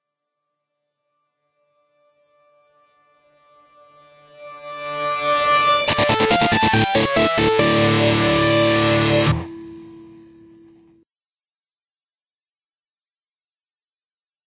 guitar and synth
evil, ponies, chord, glitchy, strange, minor, guitar, tremolo, D, magic, ending, synth